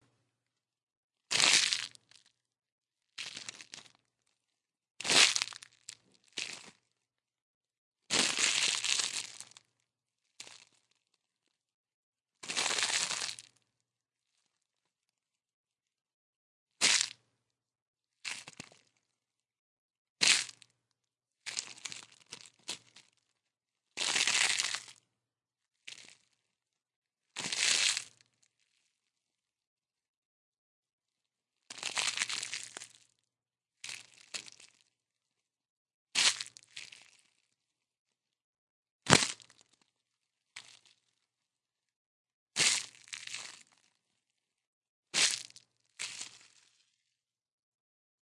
PLASTIC SQUISHING
Sound of plastic being squished and smashed.
bag, crackle, handling, plastic, smash, squeak, squish, wrap